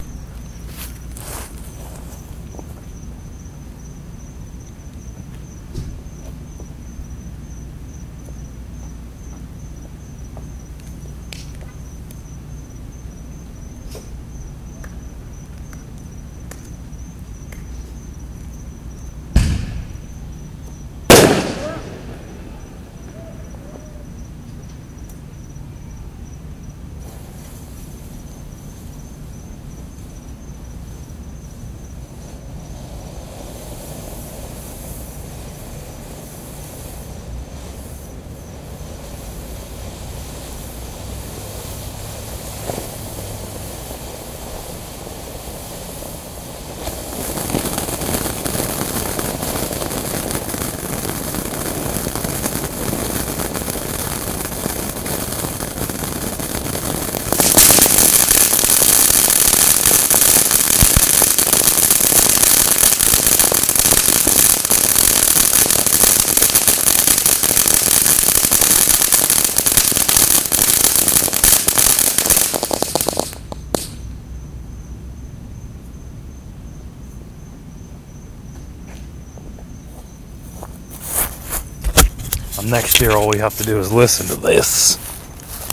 raw cheesyfireworks
Fireworks recorded with Olympus DS-40 on New Year's eve 2009.